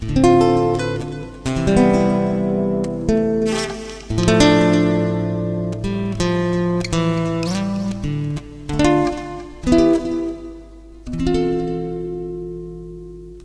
an excerpt of Mompou's Cuna, played on a nylon string guitar